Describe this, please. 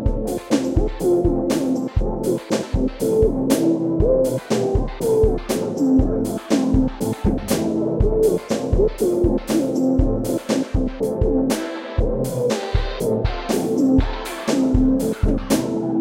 Proof of concept that a musical loop with lots of instruments can still be processed into something very different and usable in a different musical context.
Used luckylittleraven's loop:
Applied a "fake sequenced filter" - I really just manually chopped up the loop in Ableton Live and split the slices through 3 channels. MAin channel with an autofilter (bandpass filter with LFO controlled frequency)+ 2 secondary slices where I sent only a few slices. The secondary channels are panned left and right and have only a static 3 band EQ with bass and trebble cut out and different settings for centre frequency.
I grouped these channels and on top I applied an EQ to cut out the bassdrum ~50-60Hz and a flanger.
Separate channel with a basic drum pattern.
Aux channels with ping-pong delay and reverb for a bit of space.